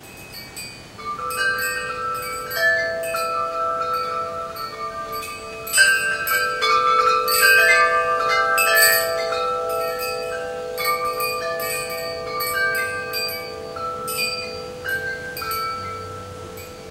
chimes part 6
These short files are random selections from a 9min composite audio file I put together from an accidental recording made when I left my Sony Camcorder on in my studio.
They are part of the same series posted elsewhere on thefreesoundproject site titled "accidental recordist".
There is some hiss/background noise which is part of the street front urban scene of my studio.
chimes, instrumental, music, tinkle